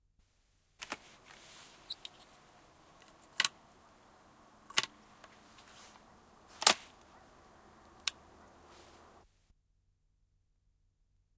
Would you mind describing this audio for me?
Haulikon lataus / Shotgun loading
Haulikon lataus kahdella panoksella
Paikka/Place: Suomi / Finland / Vihti, Leppärlä
Aika/Date: 12.10.1988
gun, shotgun, loading, haulikko, soundfx, lataus, finnish-broadcasting-company